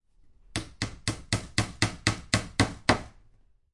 Hammering Nails, Close, A
Raw audio of hammering small, metal nails into a wooden bookshelf. Recorded inside a house. The recorder was about 30cm away from the hammer strikes.
An example of how you might credit is by putting this in the description/credits:
The sound was recorded using a "H1 Zoom recorder" on 7th October 2017.
building, construction, hammer, hammering, nail, nails, work